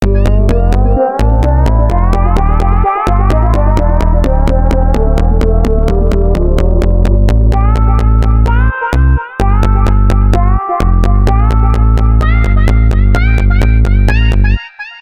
Funky Synth Music Loop at 128 BPM Key of E. From an original composition.
Synth, Drums, Bass, Loop, BPM, Funky, 128, Music